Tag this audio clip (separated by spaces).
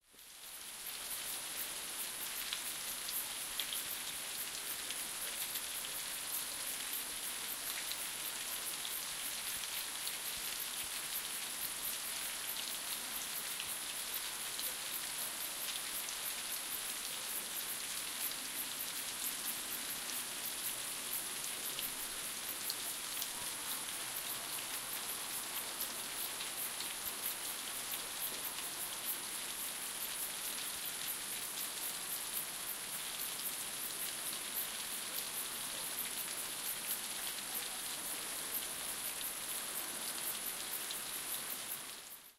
crickets drops field-recording insects nature rain